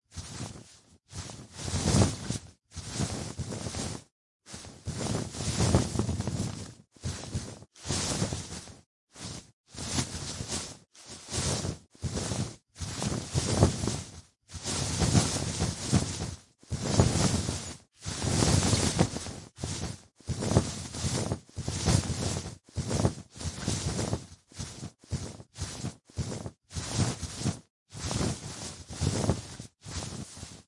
some cloth passes
Half a minute of short to medium cloth passes to use at a low volume on movement of clothing or bedsheets.
clothing, sleeve, cloth, duvet, textile, movement, sheet, fabric, clothes, material